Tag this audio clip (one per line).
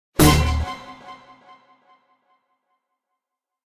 chord
cinematic
dissonant
drama
dramatic
ensemble
film
guitar
haunted
hit
horror
loud
moment
movie
musical
orchestral
realization
reveal
scary
short
spooky
stab
sting
stinger
suspense
terror
thrill